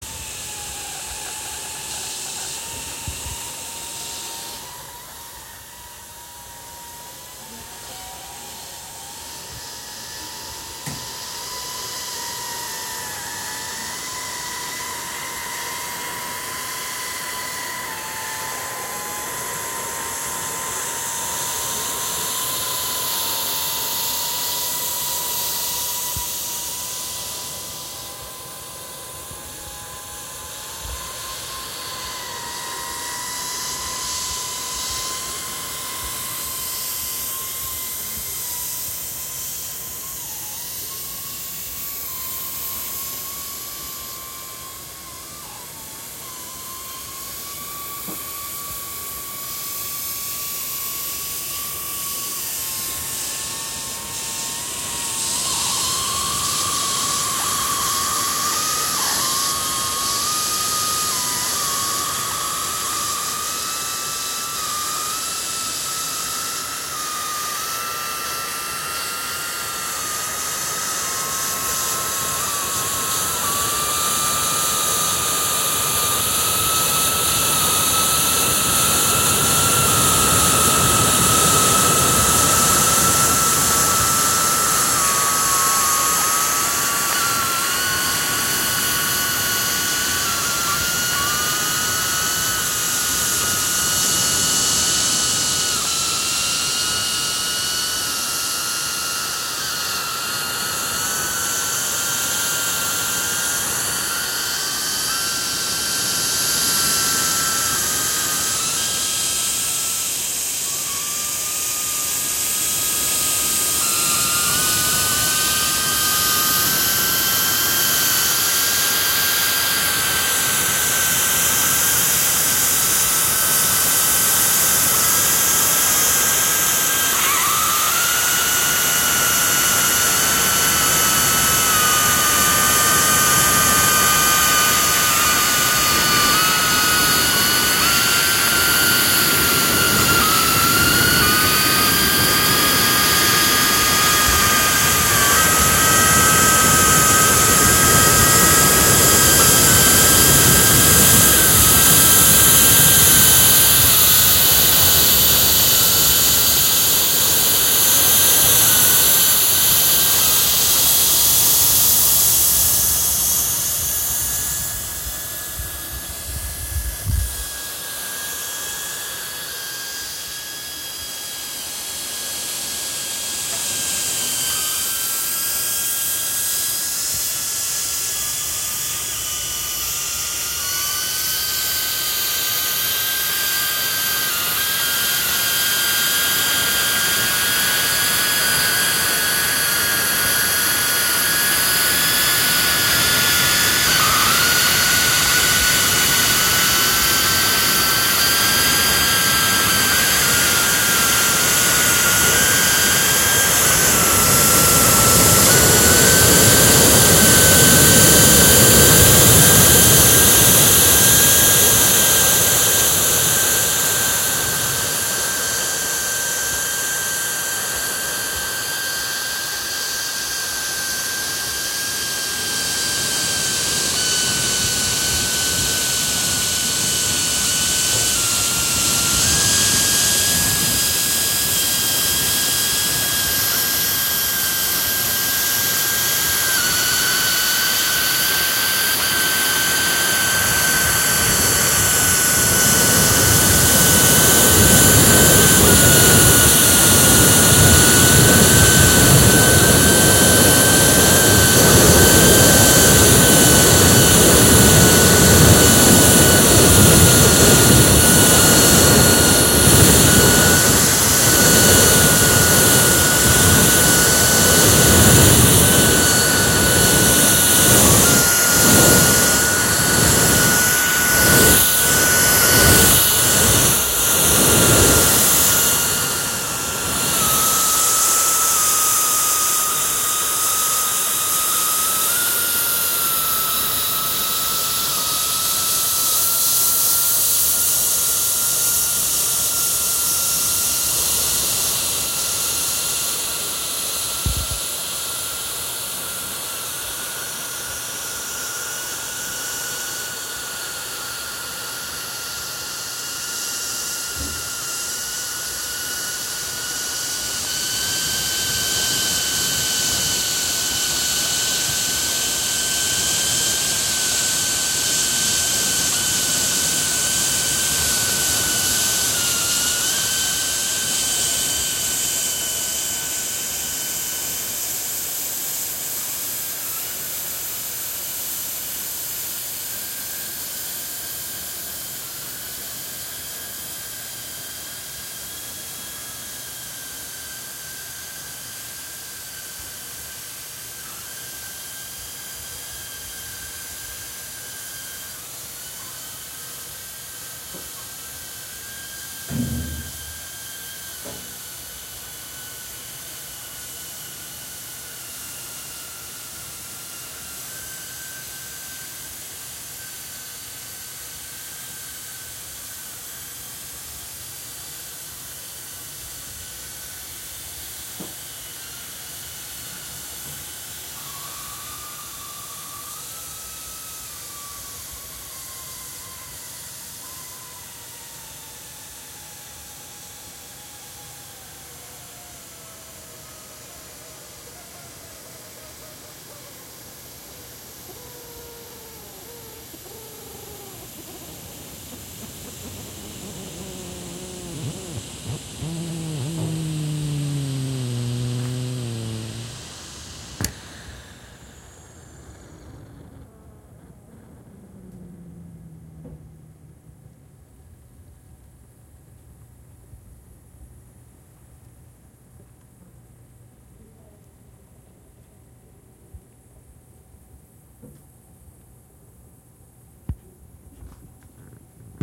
Cocote Minute
Recorded with Zoom H4 mics moving around a pressure cooker.
Expressive surrounding movements.
kitchen, home, pressure-cooker